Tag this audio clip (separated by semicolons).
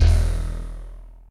sample; multi; synth